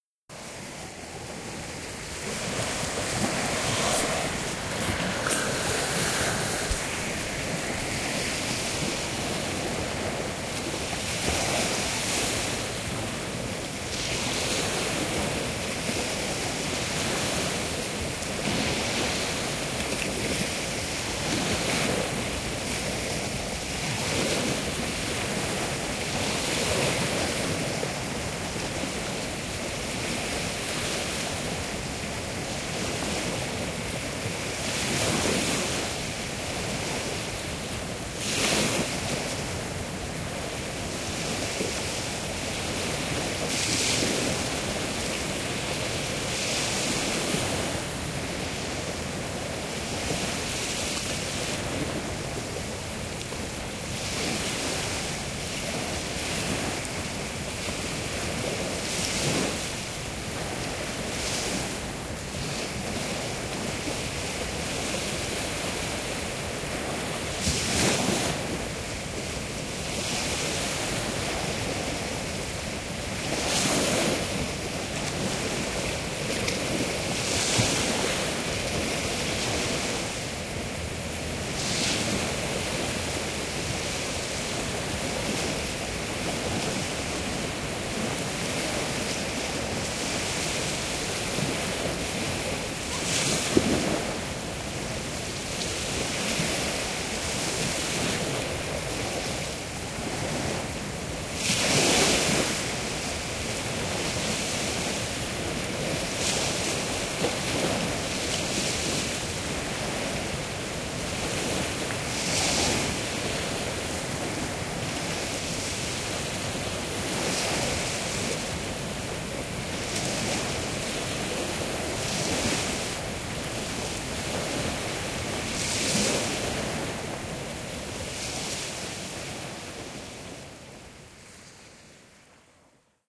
The sea recorded in Thailand, around Rayong at night. Peaceful waves lapping against the shore, mild wind every so often.